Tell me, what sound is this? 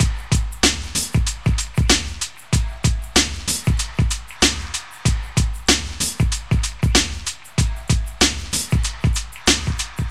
Drum loop with ambient textures created by me, Number at end indicates tempo
beat, hip-hop, loop